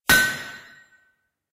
Large Anvil & Steel Hammer
A stereo recording of a single strike with a steel hammer on a piece of hot steel on a large anvil mounted on a block of wood. Rode NT4 > FEL battery pre amp > Zoom H2 line in.